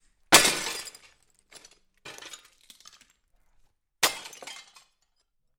FX SaSc Glass Tiles Shatter Crash
Glass Tiles Shatter Crash
Crash, Glass, Shatter, Tiles